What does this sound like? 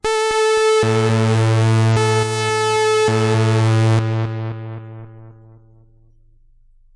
Monotron Delay 02
A sample from the Korg Monotron Delay mini synthesizer, recorded with a Sony PCM M-10 recorder, cut and noise reduction with Audition. The sound gives a siren like sound, but very "space like".
analog, beep, delay, electronic, fx, korg, monotron, space, synth, synthesizer